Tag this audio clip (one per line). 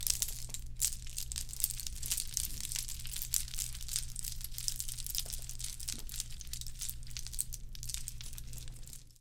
lluvia
sonido